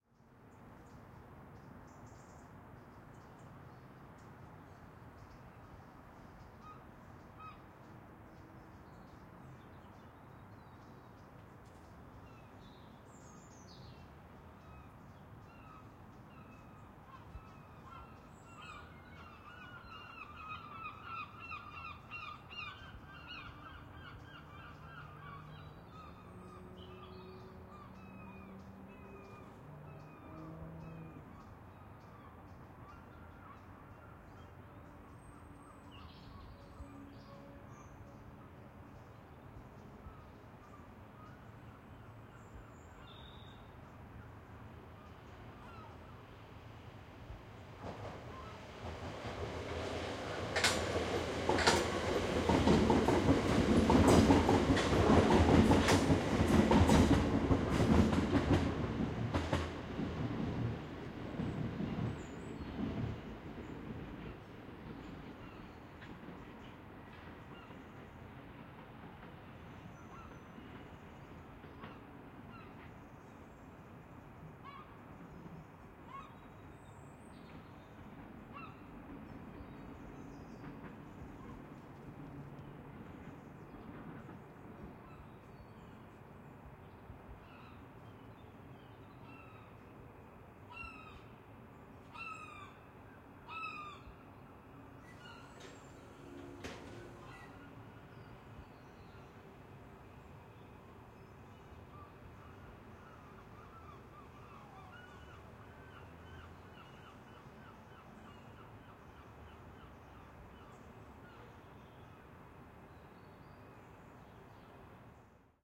Balcony Ambiance
Ambiance recording on balcony near train tracks. Residential area, distant traffic, seagulls, train passing by. Stereo XY recording using two shotgun mics on a zoom f8.
ambiance, balcony, balcony-ambiance, city, distant-traffic, field-recording, residential, seagulls, train-pass-by